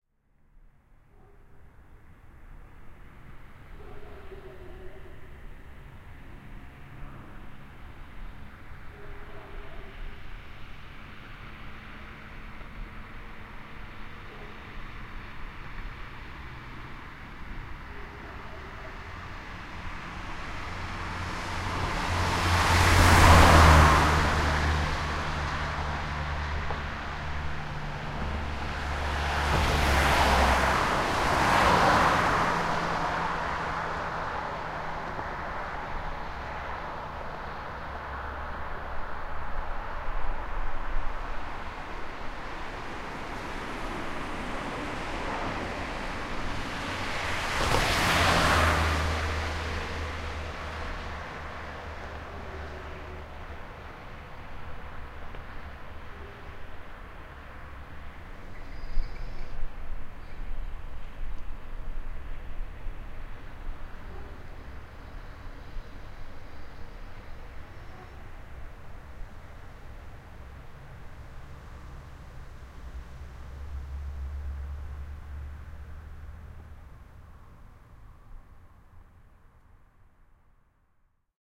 road and cars2
some cars pass the way at the nigth
recording Turkey/Ankara/Umitkoy 2008
road, cars